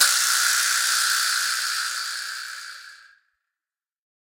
VIBRASLAP MY BITCH UP
An excellent vibraslap sample with a long decay. This was recorded with two Josephson C617s about five feet apart with the vibraslap played between them. The preamps were NPNG and the source was recorded into Pro Tools via Frontier Design Group converters. There has been no further processing.